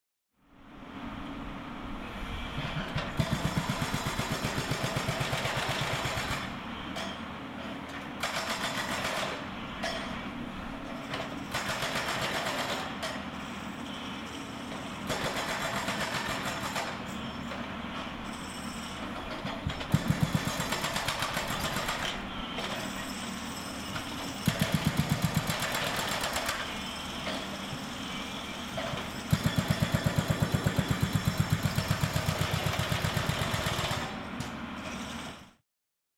front end loader breaking concrete, jackhammer
Recording of a front end loader with a strange pointy attachment, breaking concrete by pushing on it until it breaks. You can also hear a jackhammer pounding a little further away. Recorded at about 30 feet.
break, concrete, industrial, jackhammer